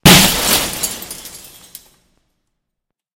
Windows being broken with various objects. Also includes scratching.